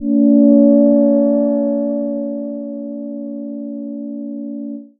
Short Minimoog slowly vibrating pad